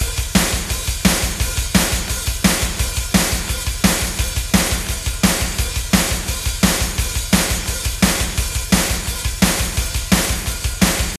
metal drums 4 doubletime
metal drums doubletime
doubletime, drums, metal